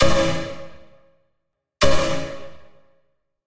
nervousraver 2stab
Two shots of a hollow-sounding high-frequency short-delay flanger on a synth chord. First is firmer, second has more of a suck to the attack.